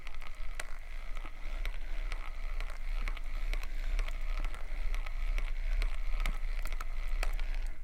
The sound of reeling in a Shimano Symetre reel.